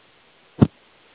one click, just call drop.